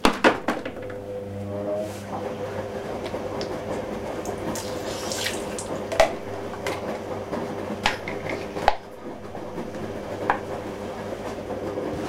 Random bathroom set noise. Recorded with Edirol R-1 & Sennheiser ME66.
laundry, bathroom, washing, teeth, machine, room, brushing, bath, set-noise